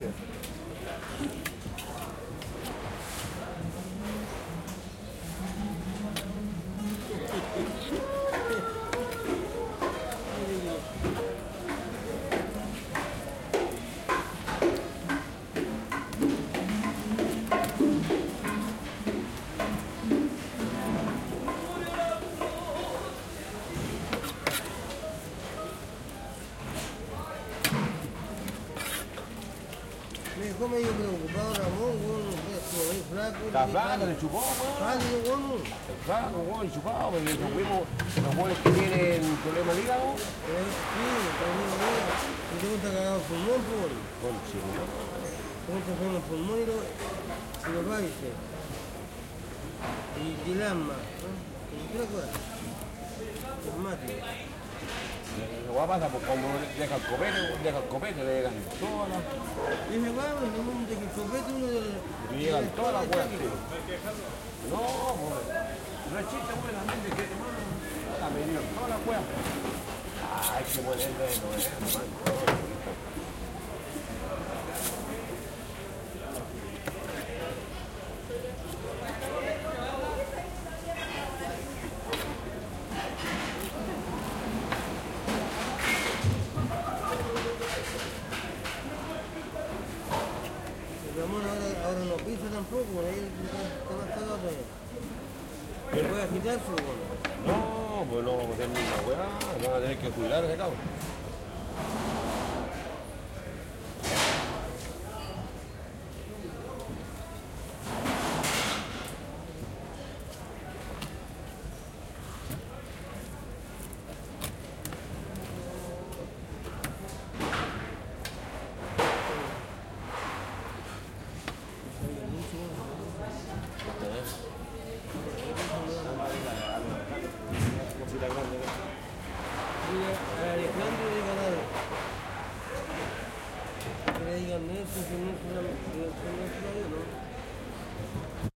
Mercado Central, Santiago de Chile, 11 de Agosto 2011. Cortando pescado.
Cutting fish in the market.